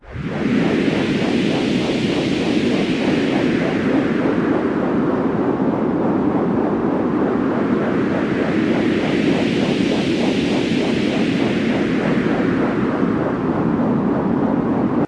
deep space
a weird white noise sound, that concludes into a spacey sound.Made with a noise plug in